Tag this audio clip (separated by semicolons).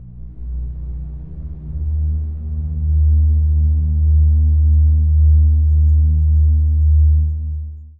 ambient; multisample; pad; reaktor